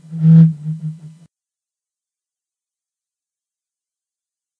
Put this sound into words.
sound of an air blown into bottle